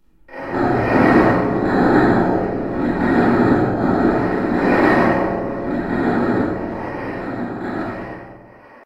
Something Big Trying To Escape
This is the sound of two plastic aspirin bottles being shaken. One bottle is large and the other one is very small. Each track got a pass through Audacity with the pitch lowered on the larger one and then a slight dose of gverb effect. The other one has the pitch raised a bit with an equal amount of gverb. Then I made one copy of each and tacked them on a second before the end of the first group in order to lengthen the clip a little bit.
escape, monster, thud